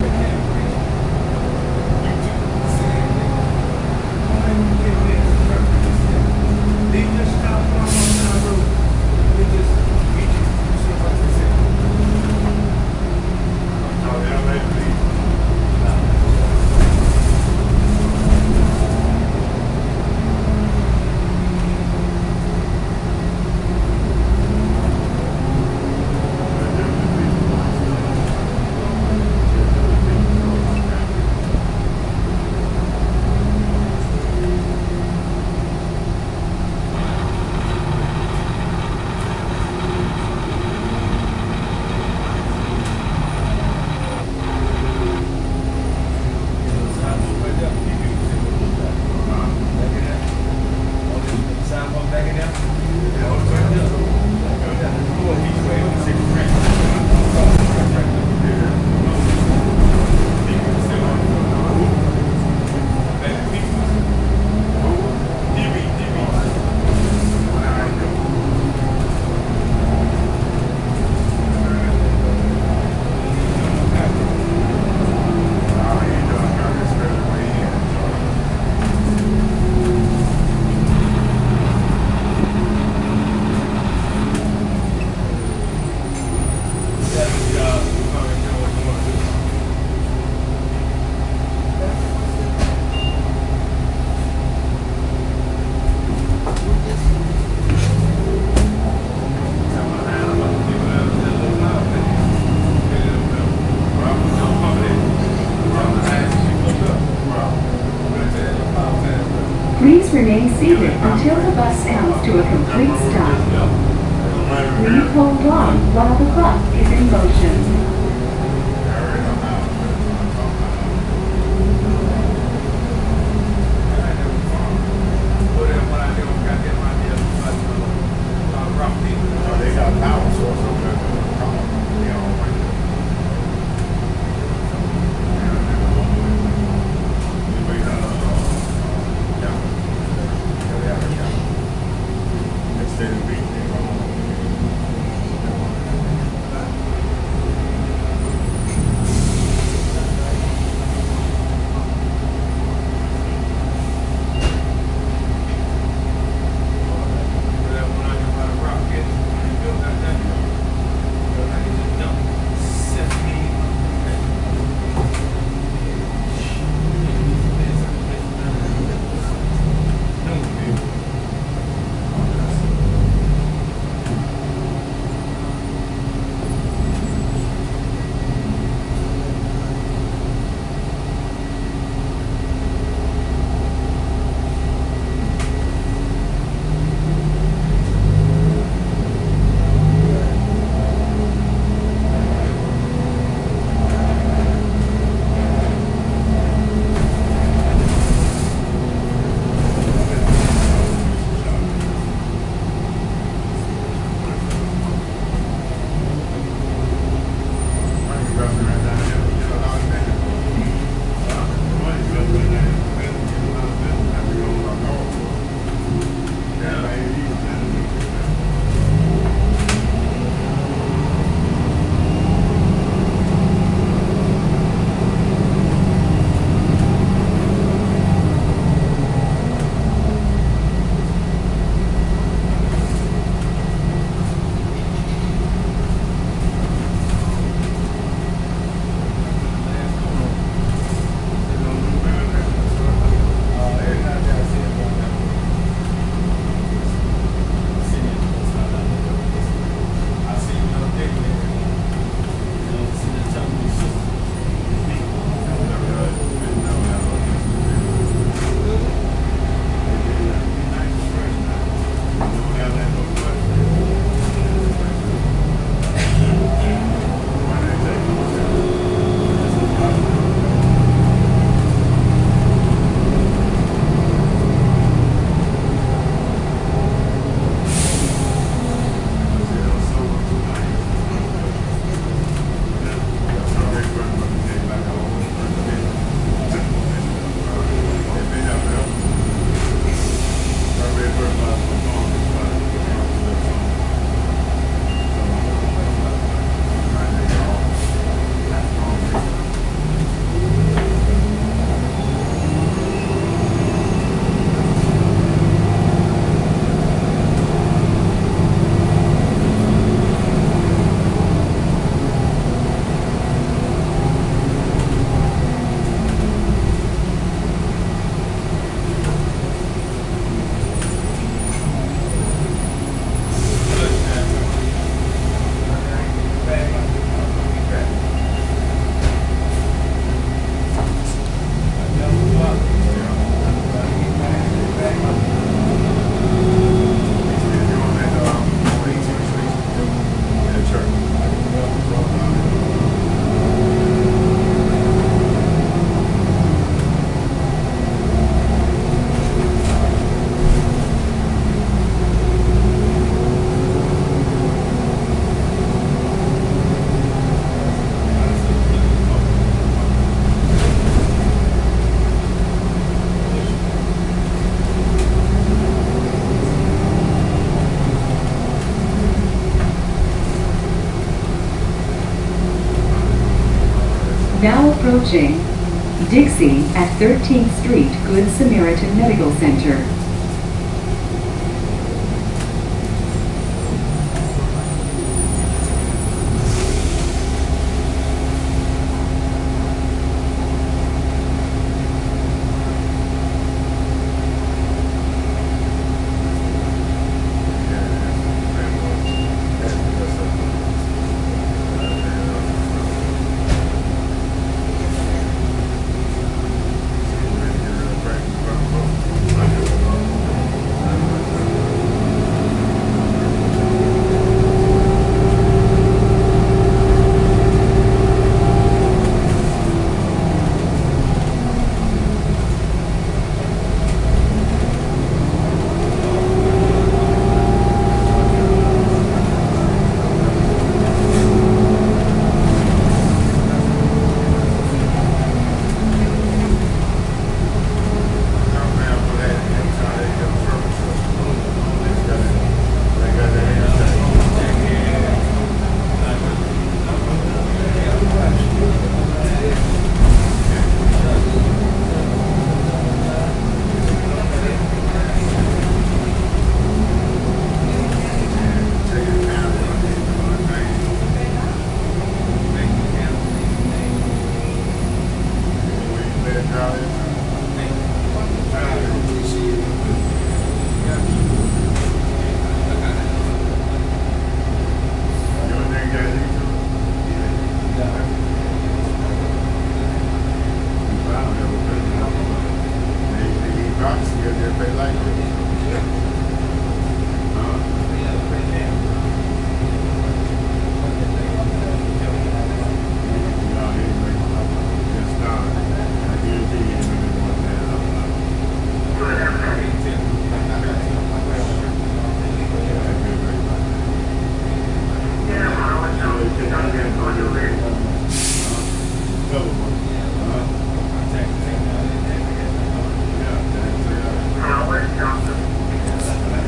Hopefully the last time I will be in the humiliating position of relying on public transportation to get to work (fingers crossed). Inside the bus of lost souls.